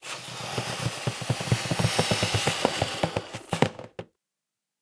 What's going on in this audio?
Balloon-Inflate-33-Strain
Balloon inflating while straining it. Recorded with Zoom H4